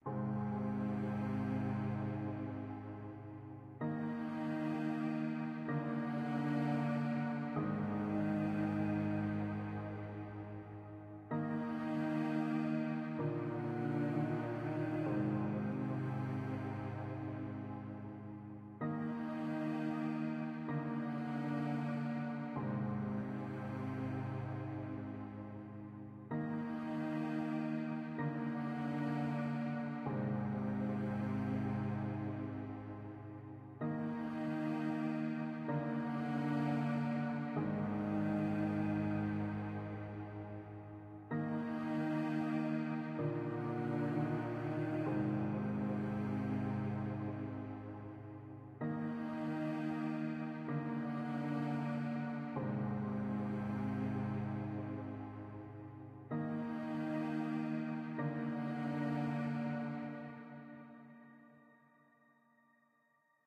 Sad Orchestral Loop, FL Studio 11, EWQL Orch